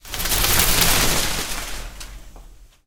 Umbrella shake1
Sound of ubrella shaking
noises, egoless, sounds, umbrella, vol, 0, natural